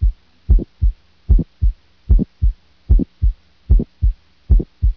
Cardiac and Pulmonary Sounds
cardiac pulmonary anatomy
anatomy, cardiac, pulmonary